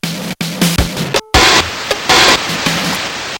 LSDJ At its best (well... ) I just bought the thing. Lay off these rythms Kids....
little, my, sounds, chiptunes, glitch, today, melody, me, nanoloop, kitchen, lsdj, c64, big, table, drums